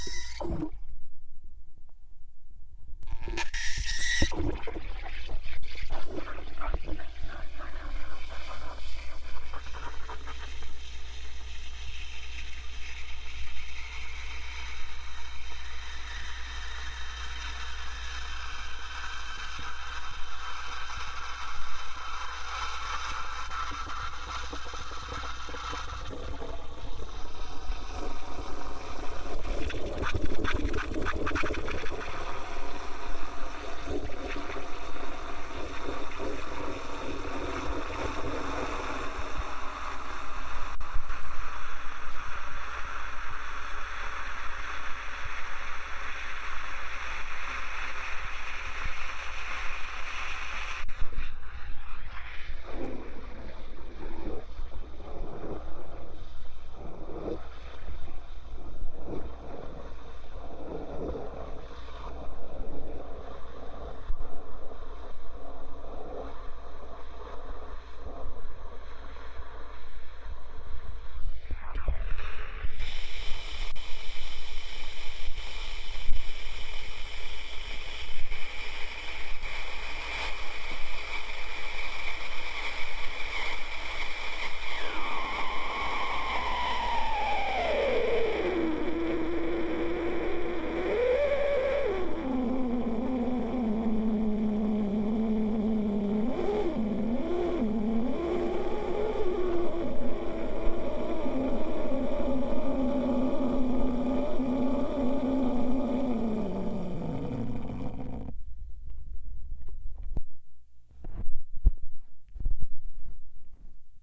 Metal container of milk injected with steam recorded with a contact mic into a Zoom H4N.